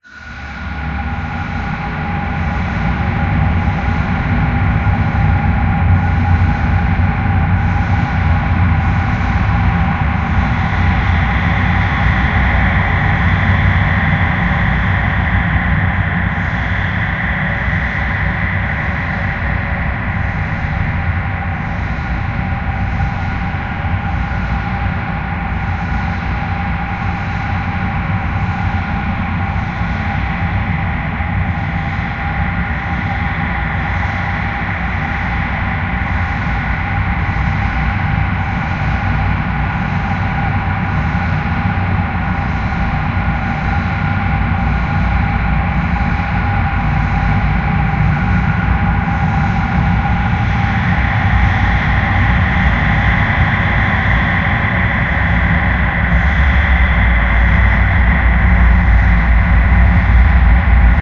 Dark Temple
Ambient, Atmosphere, Cave, Cinematic, Dark, Drone, Fantasy, Field-recording, Film, Horror, Movie, Scary, Secret, SFX, Soundscape, Temple, Travel